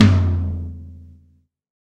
DW drum kit, used: Sennheiser e604 Drum Microphone, WaveLab, FL, Yamaha THR10, lenovo laptop